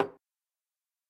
Tuba Percussion - Clave
Substitute clave sound made by banging on a tuba. Made as part of the Disquiet Junto 0345, Sample Time.
drums, percussion, percussive, tuba